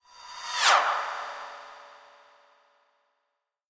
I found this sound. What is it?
cymb shwish 35
cymbal hit processed with doppler plugin
cymbal, doppler, hit, plugin, processed